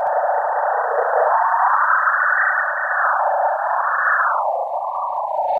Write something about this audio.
theremin4mangled
Mangled beyond recognition.